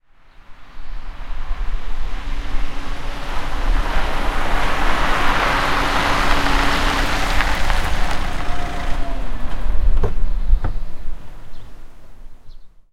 Auto with fadeout birds
Diesel car drives from the distance and then the engine stops. With small fadeout at the end of the clip. Some random birds can be heard after the engine is stopped.
birds, car, diesel, engine, gravel, idle, stop